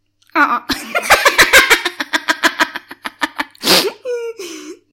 witchy laugh 1
A witchy laugh.